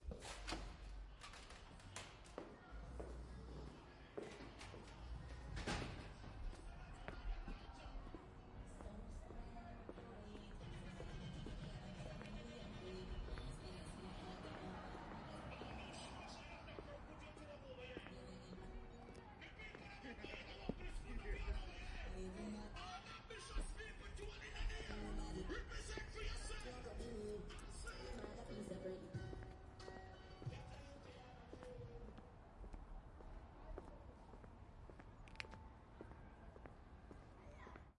Walking alongside eastern parkway
Ambience, Eastern, Parkway, People, Cars, NYC, Brooklyn